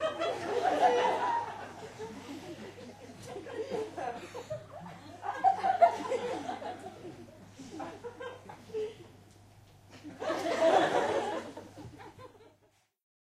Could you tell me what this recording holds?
Few short Laughs
Few laughsFew laughs in medium theatreRecorded with MD and Sony mic, above the people
crowd, audience, auditorium, laugh, prague, czech, theatre